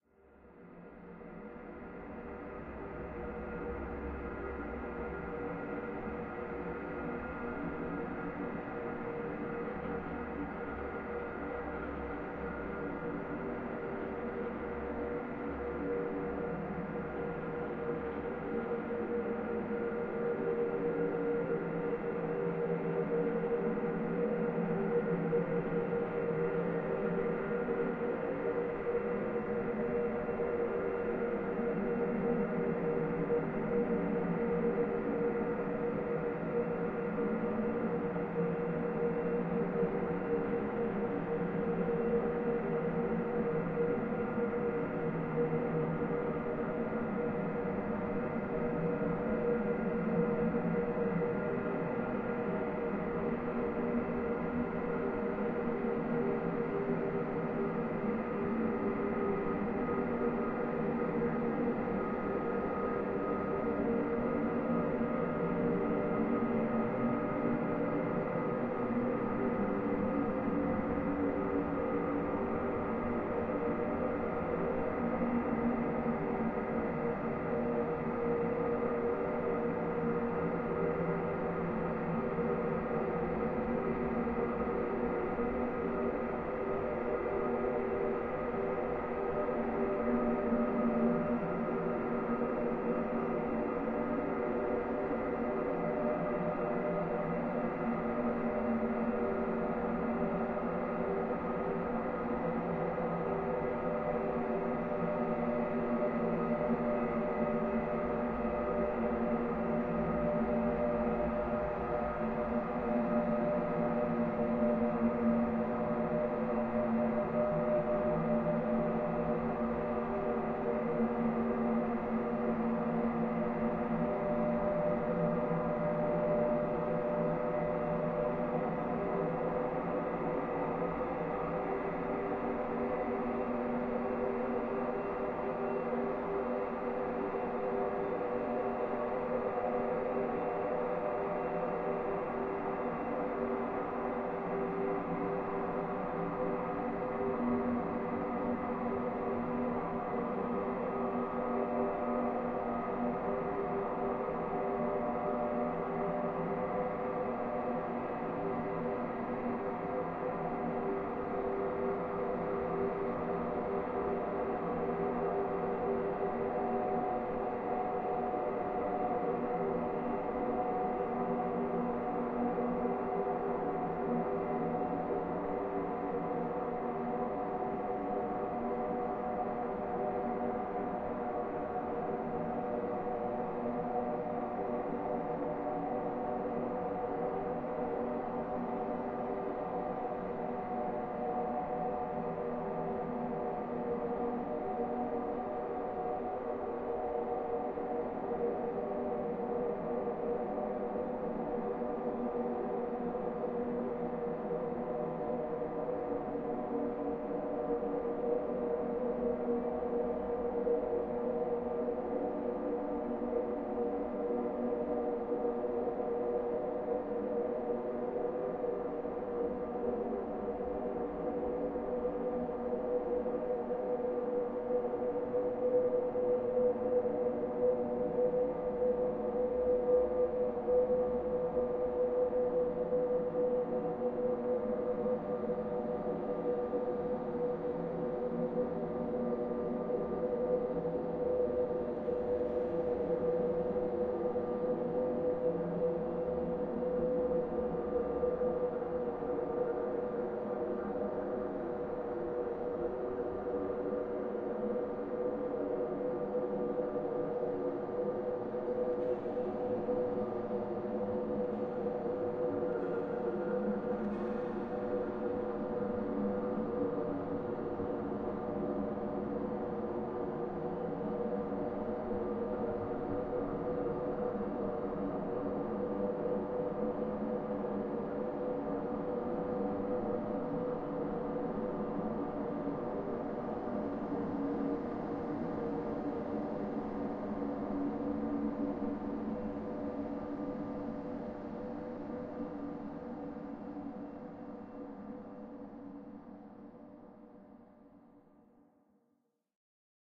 Ambience 09. Part of a collection of synthetic drones and atmospheres.